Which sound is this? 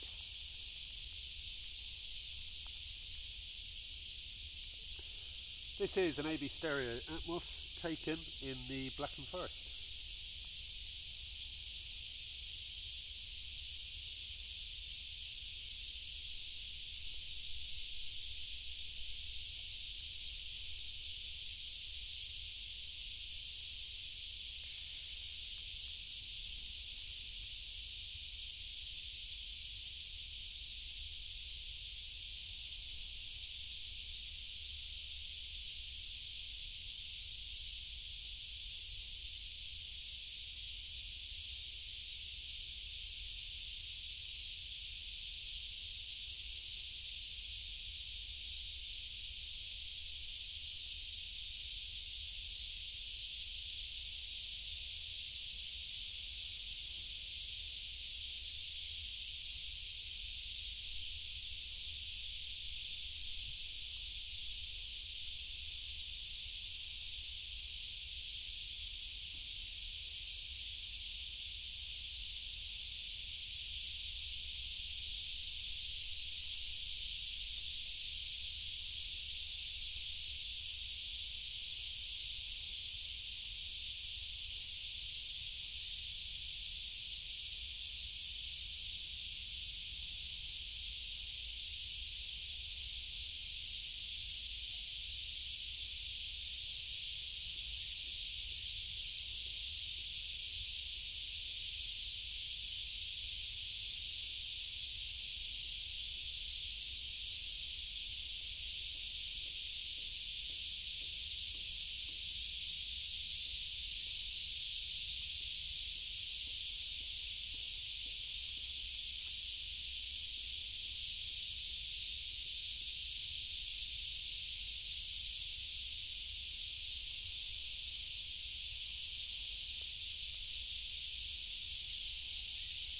Atmos distant Cicadas Tunisia
cicadas, Atmos